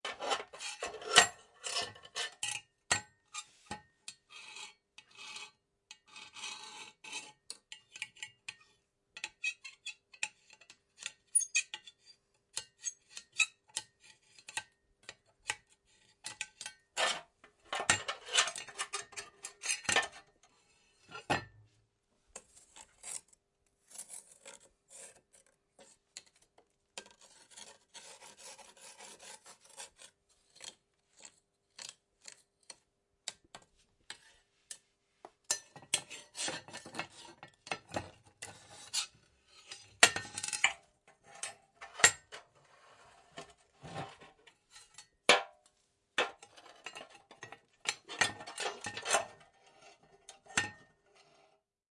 Metal Rumble
Recoreded with Zoom H6 XY Mic. Edited in Pro Tools.
Having fun with a steel stick, metal dish, piece of glass, iron box and other junk.
scrape, rumble, creak, steel, metal, noise, random